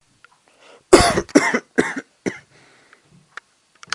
Boy Coughing #1
A person coughing repeatedly. Created by me coughing.
choke, cough, coughing